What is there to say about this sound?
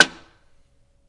ATIK 2 - 14 stereoatik
PERCUSSIVE CLICKY These sounds were produced by banging on everything I could find that would make a sound when hit by an aluminium pipe in an old loft apartment of mine. A DAT walkman was set up in one end of the loft with a stereo mic facing the room to capture the sounds, therefore some sounds have more room sound than others. Sounds were then sampled into a k2000.
household, acoustic, percussion